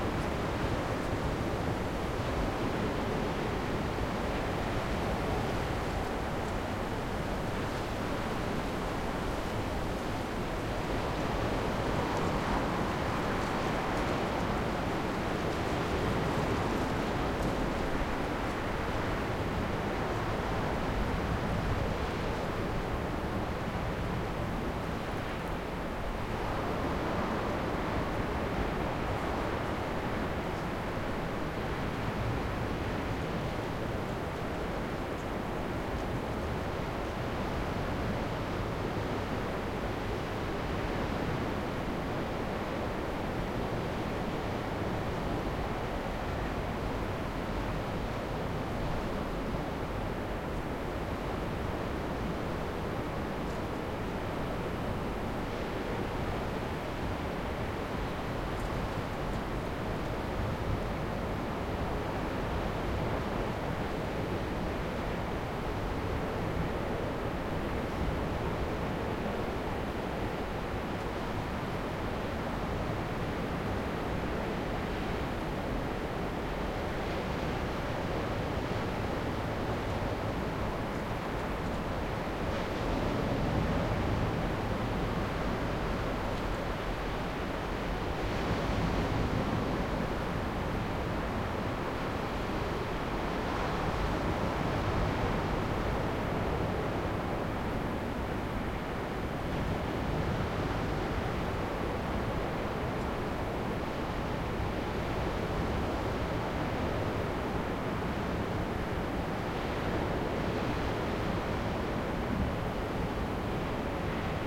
4ch-surround, wide angle field recording of the seaside at Warnemünde on the German Baltic Riviera.
Recording was conducted in October 2013 on a hotel balcony approx. 100m away from and 20m above the shoreline.
Recorded with a Zoom H2, these are the REAR channels, mics set to 120° dispersion.
waves, sea, beach, surf, nature, ocean, wide-angle, ambient, shore, quiet, peaceful, wind, baltic, water, field-recording